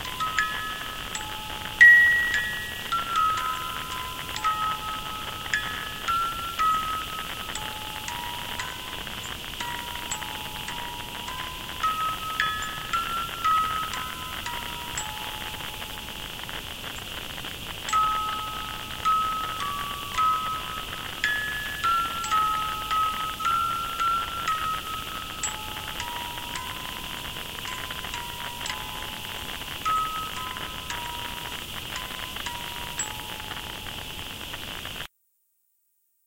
Inspired by a strange Japanese number station I heard around 2012. It gets even creepier if you lower the pitch.